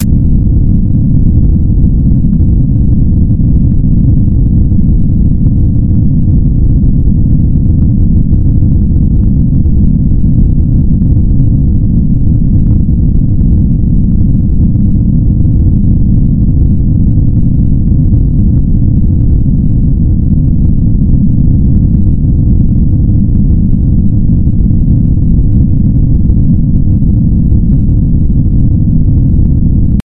19 LFNoise2 400Hz
This kind of noise generates sinusoidally interpolated random values at a certain frequency. In this example the frequency is 400Hz.The algorithm for this noise was created two years ago by myself in C++, as an imitation of noise generators in SuperCollider 2.